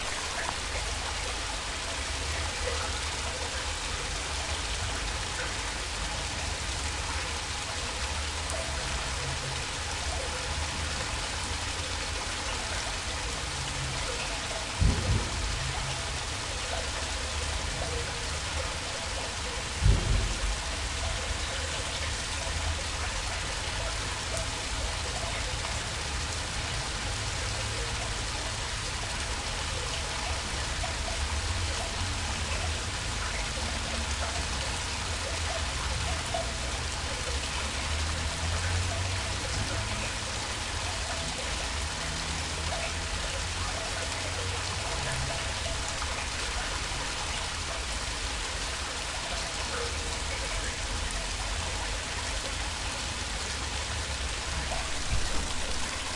tubeWaterfall near outlet
Waterfall from wastewater pipe on the riverside near Leningradsky bridge. Sound recorded near pipe outlet.
Recorded 2012-10-13.
XT-stereo